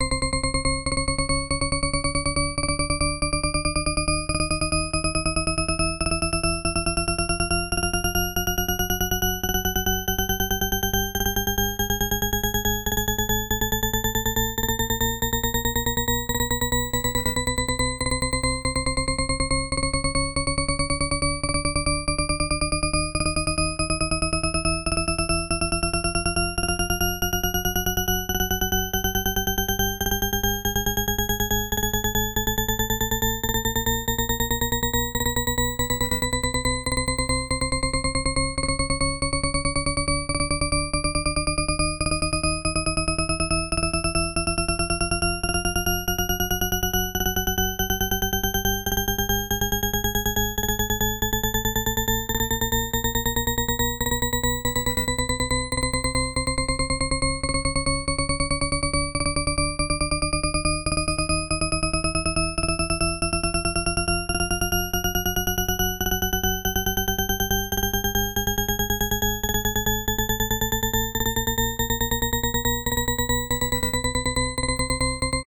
Pitch Paradox UpBeat
This is an example of the 'pitch paradox' with rhythm, listen to the rising pitch, and it seems to keep rising forever, which is impossible, hence the paradox :)